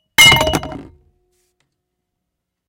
Wine glass falls but doesn't break
AKG condenser microphone M-Audio Delta AP